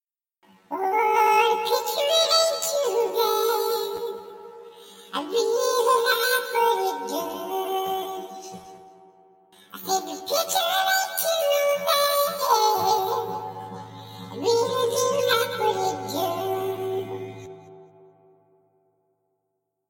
Auto Tune Sample
Here's a sample I made using the FL Studio Pitcher VST plugin. I used the VST in Audacity in combination with AM Pitch Shifter plug in.